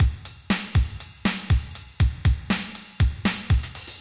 4 Beat 10 upbeat
upbeat drum loop
drums, loop, Trip-hop, drum-loop, Triphop, beat